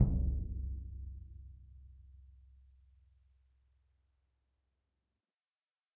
Symphonic Concert Bass Drum Vel13
Ludwig 40'' x 18'' suspended concert bass drum, recorded via overhead mics in multiple velocities.
bass, concert, drum, orchestral, symphonic